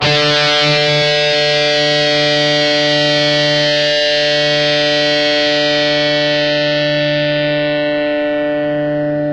15 Dist guitar d
Long d note - Distorted guitar sound from ESP EC-300 and Boss GT-8 effects processor.
distorted, distortion, guitar